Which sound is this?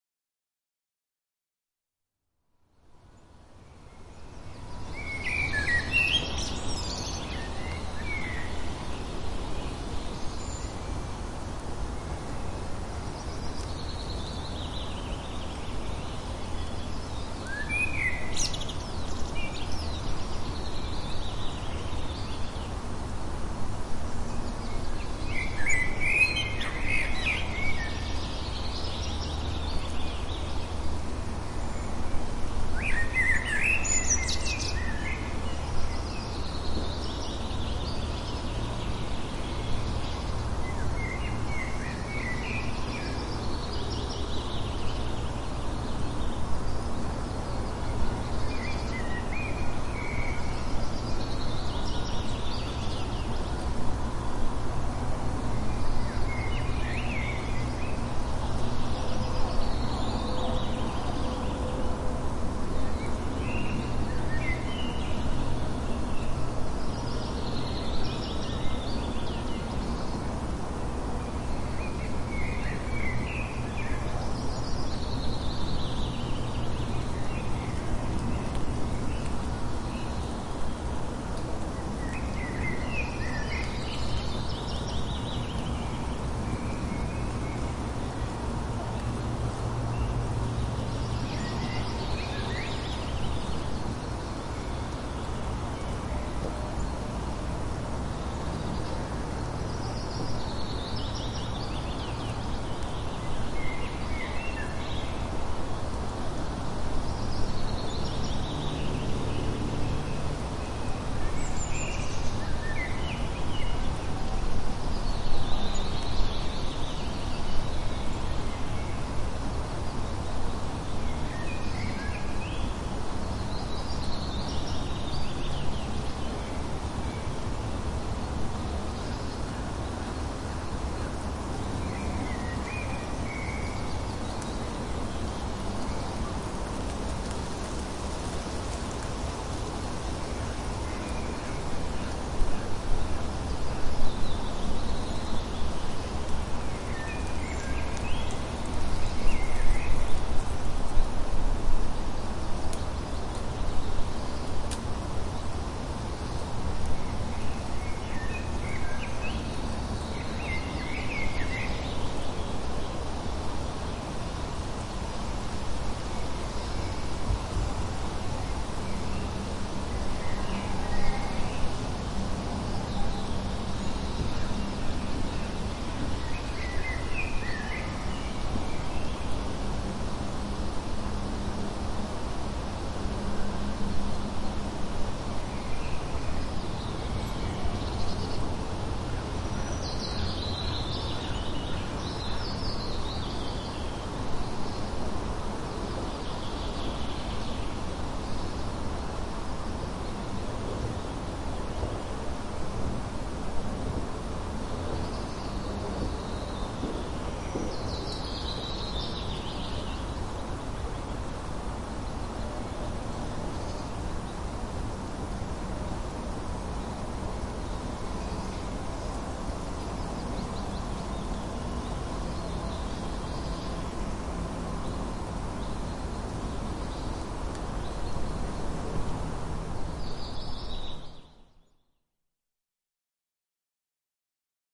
Vartiosaari woodland
Four minutes in a light rain shower on the island of Vartiosaari, Helsinki, Sat June 7th 2014 in the early afternoon. Recorded on a Tascam DR-2d, hand-held recorder using built in stereo mics.
Vartiosaari is 200 metres from the city but almost completely wild, with just a few houses, no street lighting, no cars and no roads. You get to it by boat. It is a beautiful place which needs to be kept as it is for everyone's benefit. There are plans to build on it.
The petition to save it in its current beautiful state is here, please sign it.
The great event I went to Vartiosaari for: